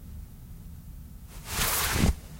sweatshirt removal
Someone taking their hoodie (sweatshirt) off.
sweatshirt, removal, take-off, hoodie